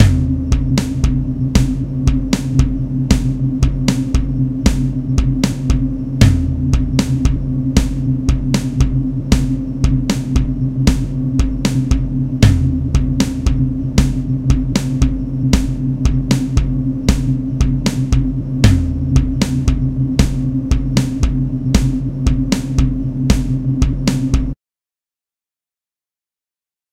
creepy drum
Used Ableton to make a halloween track here is a drum sample
beat, creeby, drum, halloween, scary, wierd